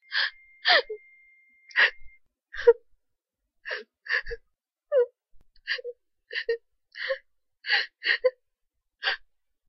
A woman crying.
crying, human, sob